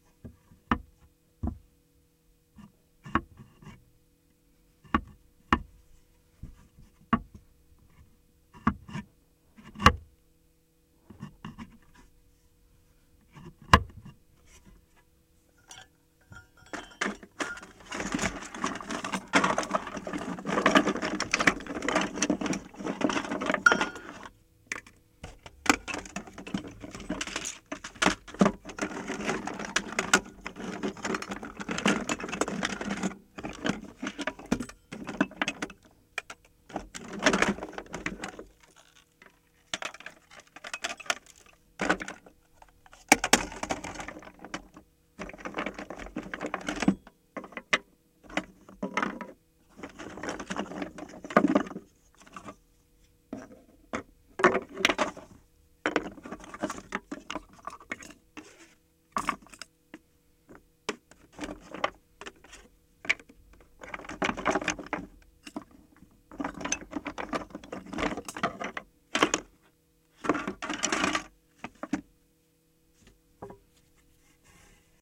Foley: open/close small wooden footlocker. Rummaging through toys.
rummaging, toys, box